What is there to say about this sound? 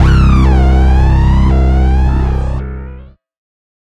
Acid Bass: 110 BPM C2 note, not your typical saw/square basslines. High sweeping filters in parallel with LFO routed to certin parts sampled in Ableton using massive, compression using PSP Compressor2 and PSP Warmer. Random presets, and very little other effects used, mostly so this sample can be re-sampled. 110 BPM so it can be pitched up which is usually better then having to pitch samples down.
110, 808, 909, acid, bass, bounce, bpm, club, dance, dub-step, effect, electro, electronic, glitch, glitch-hop, hardcore, house, noise, porn-core, processed, rave, resonance, sound, sub, synth, synthesizer, techno, trance